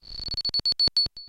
A high pitched stuttering beeping. Created with a Nord Modular synthesizer.
digital, glitch, stutter, alarm, sound-design, beep, electronic